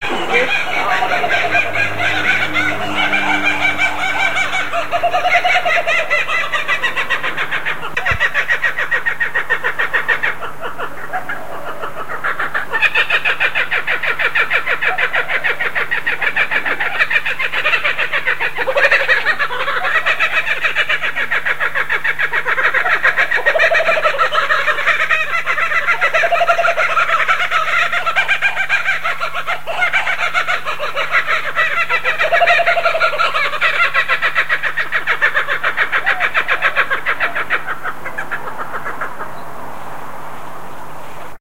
two kookaburras in duet recorded in queensland